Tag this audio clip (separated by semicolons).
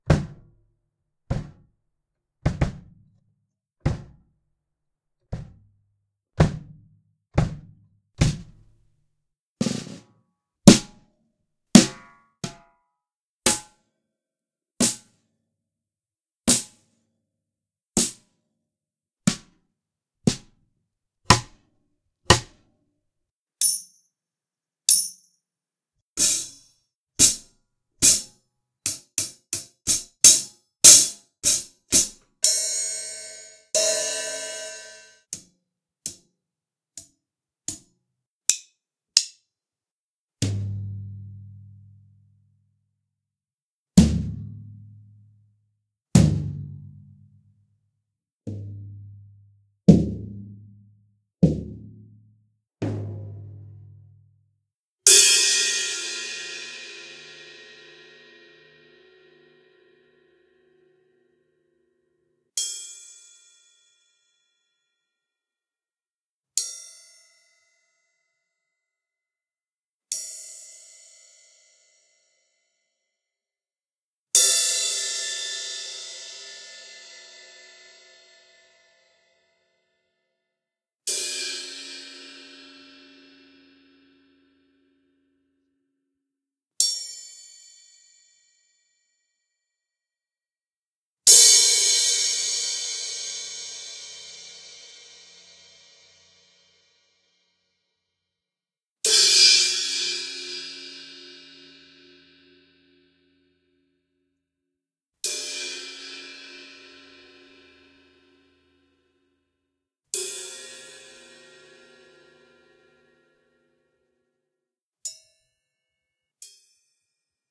hihat; bass-drum; tambourine